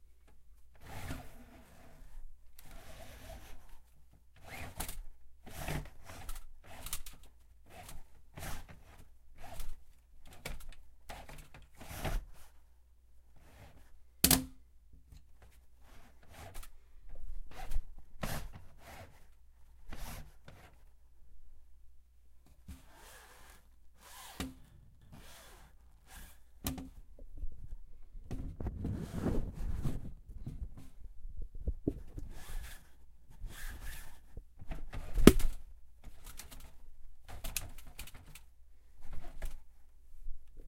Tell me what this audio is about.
Push-up blinds on a window being open and shut, occasionally rattling against the frame
blind, blinds, rattle, window, pane, open, clatter